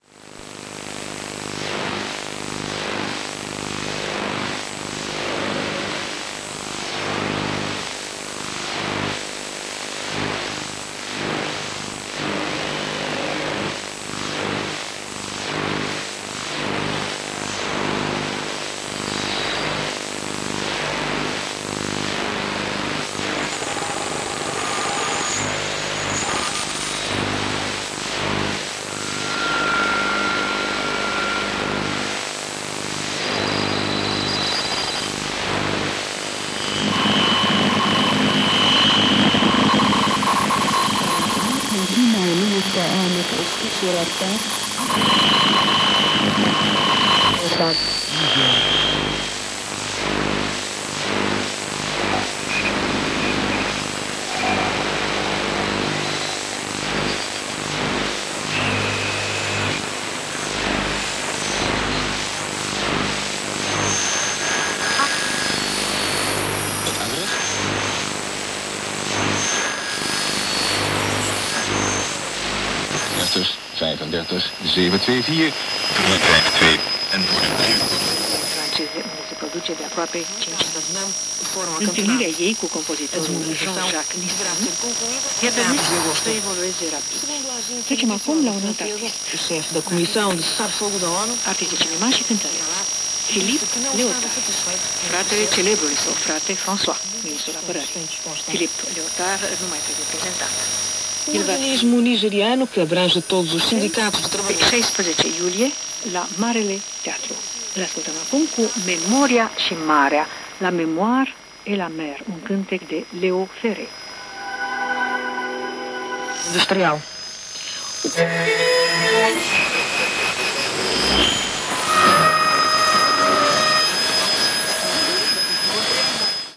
Random dial sweep in the shortwave.
electronic, shortwave, static, noise, radio